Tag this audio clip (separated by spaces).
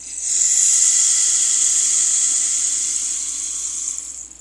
percussion rain rainstick reverb shaker sound-effect